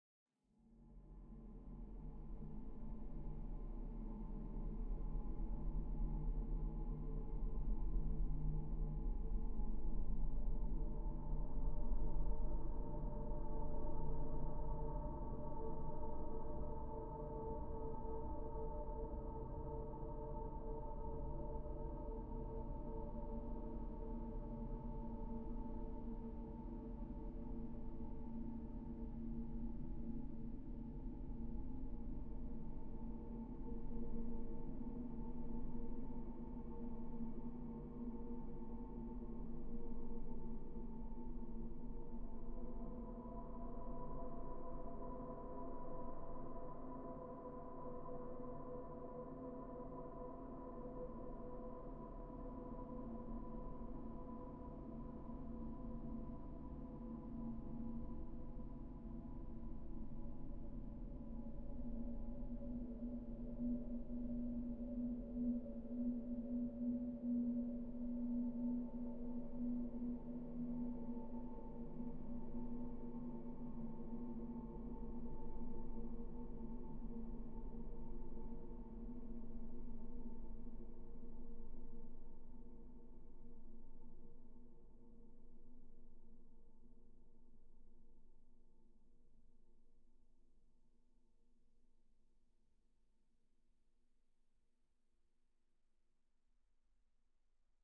A deep atmospheric drone, created from reverb releases. The raw sounds were hits on a metal gym ladder, with lots of Rayspace plugin applied; the releases were singled out and stiched together with some extra reverb added.
ambient, artificial, atmospherics, drone, evolving, freaky, soundscape